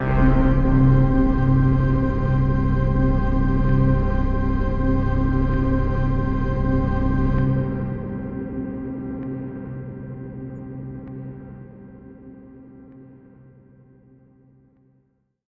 Spacey Airy Pad
Created by layering strings, effects or samples. Attempted to use only C notes when layering. Strings with layered synth and bass.